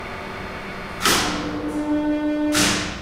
An old Soviet/Russian elevator running on low speed.
Before an elevator reaches the stop point it enters precise stop point when its motor switches to the lower speed. When it runs on low speed it produces pretty industrial sound.
This is elevator nr. 7 (see other similar sounds in my pack 'Russian Elevators')
engine, hum, motor, machine, industrial, mechanical, noise, elevator